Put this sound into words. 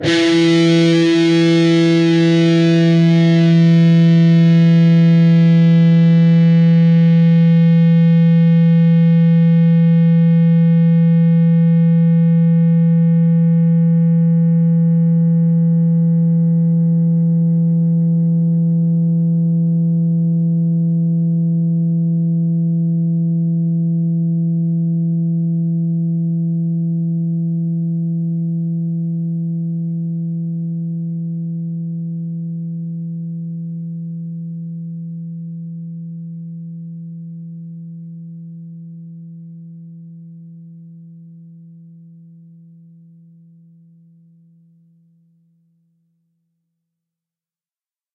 E (6th) string, on the 12th fret.